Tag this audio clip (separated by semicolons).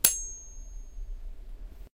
knife; slash; short-sword